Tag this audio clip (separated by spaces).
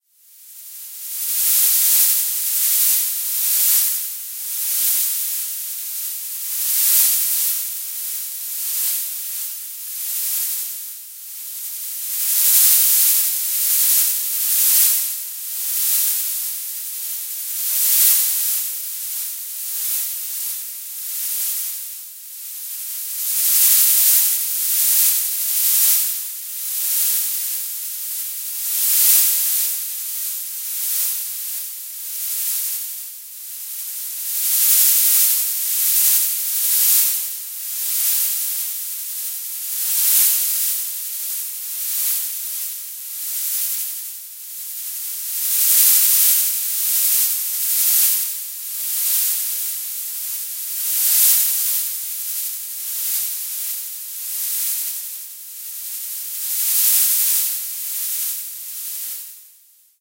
reaktor ambient